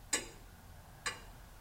Tic-Tac
Tic Tac of an old clock.